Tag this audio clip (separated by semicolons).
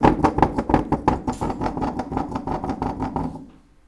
Galliard; UK; grind; School; Primary